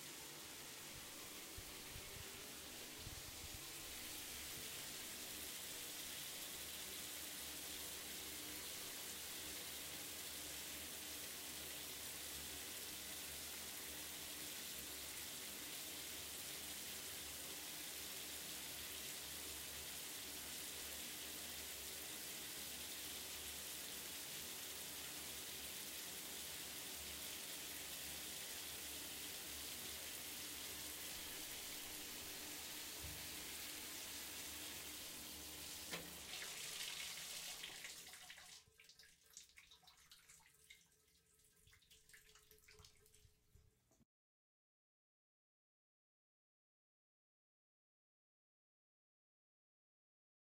untitled shower 1
field-recording, shower, water